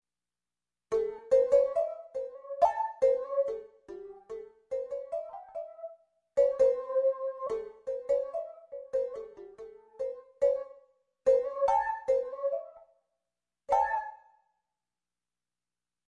Recorded in stereo with a MIDI guitar/Roland GR-33 guitar synth at 1:20AM EST. YAWN! Strange sound...sounds ethnic, like a singer and someone banging on a tin can in unison.